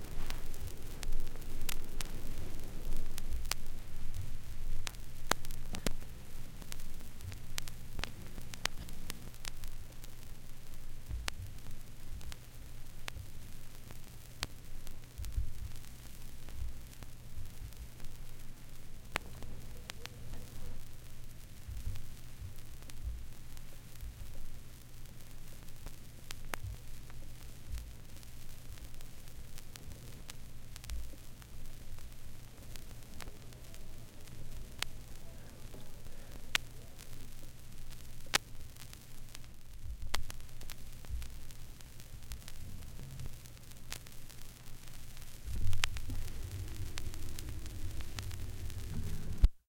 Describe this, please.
BnI side1a noise
LP record surface noise.
album, crackle, LP, phonograph, record, retro, surface-noise, vintage, vinyl